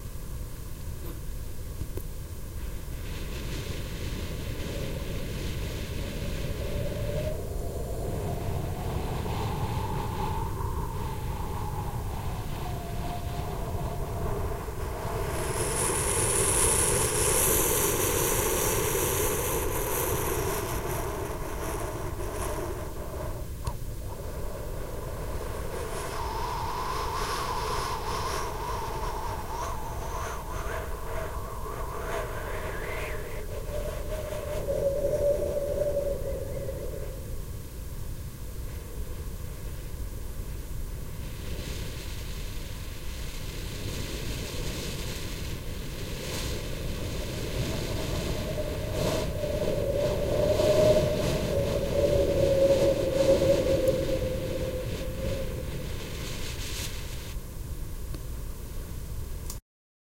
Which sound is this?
I'm proud of this single-track sample of myself making wind noises in my room with my AKG. I almost feel like I'm there, if that makes sense.
competition, element, human-sample, wind